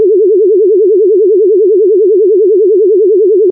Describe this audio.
Sci-fi alien UFO warble
A warbling effect such as from an alien device in a cheesy 1950s sci-fi movie. Created in Adobe Audition.